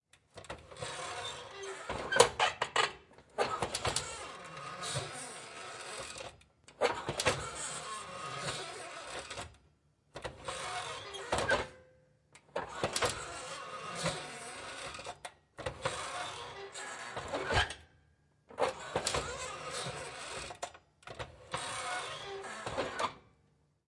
Old Electric Stove, Oven Door Hinge Creak 1
Sounds recorded from an old electric stove, metal hinges, door and switches.